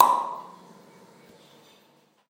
WC impulse 1
convolution, reverb, impulse, ir, toilet, tiled